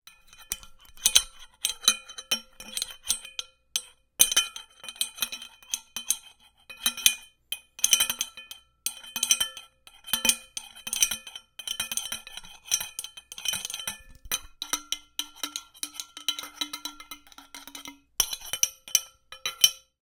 Metal Canteen Rattle 001
The sound of a canteen or another type of metal container being rattled around or something rattling inside of it.
jingle, jug